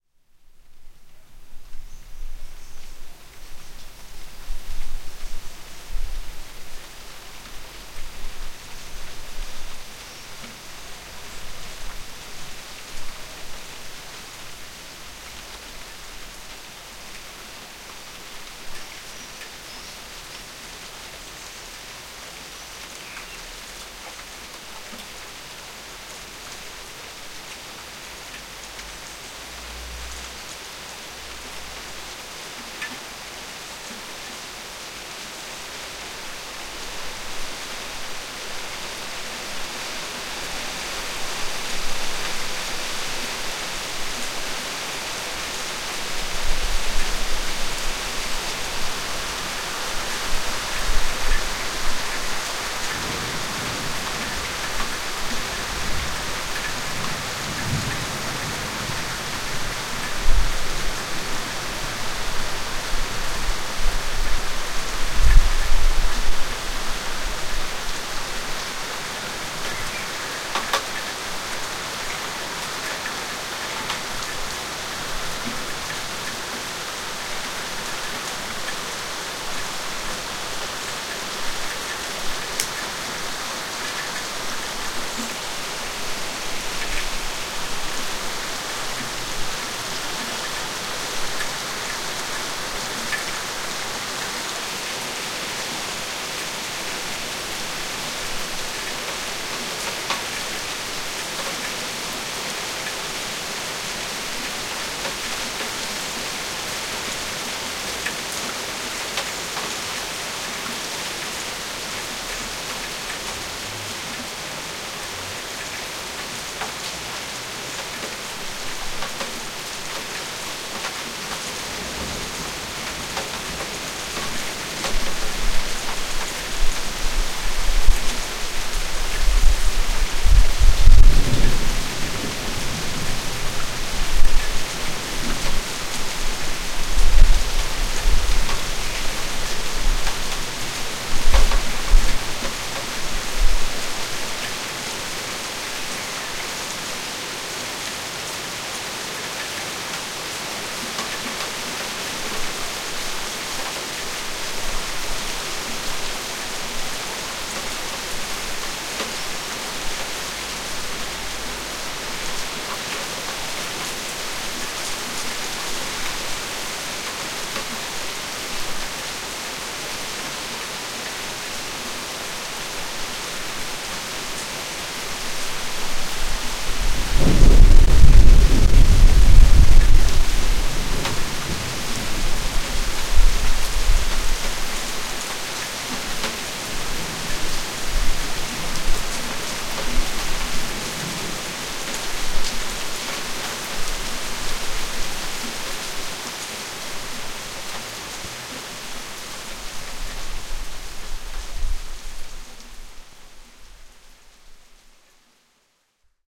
starts with soft raining, rain gets stronger, at the end a deep thunder far away. Recording with high quality stereo condenser mircophone.